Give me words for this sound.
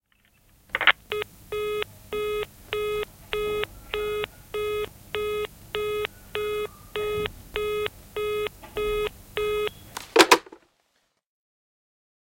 Lankapuhelin, varattu / A landline digital telephone, line, crackle, line busy signal, receiver hang up
Digitaalinen pöytäpuhelin, linja. Raksahdus, varattu ääni linjalta, luuri alas.
Paikka/Place: Suomi / Finland / Lohja
Aika/Date: 14.10.1996
Varattu, Busy, Soundfx, Yle, Tehosteet, Lankapuhelin, Receiver, Suomi, Linja, Puhelin, Finland, Yleisradio, Landline-telephone, Phone, Line, Telephone, Finnish-Broadcasting-Company, Field-Recording, Luuri